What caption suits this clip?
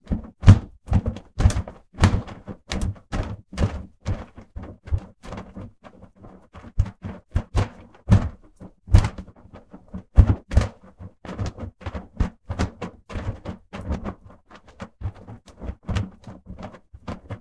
RAH Flapping Fabric 1
Recording #1 of my own shirt flapped, snapped, whipped, waved etc. If you pitch-shift this down (or slow it down) it can sound (IMO) indistinguishable from, say, a large flag or large sail being pulled and snapped in the wind.
This had recording noise removed, and silences auto-trimmed, with auto-regions from that trimming generated and saved in the file (handy for selecting a sound region easily or exporting regions as a lot of separate sounds).
flap, cloth, flag, whip, wave, boat, sail, fabric, slap, snap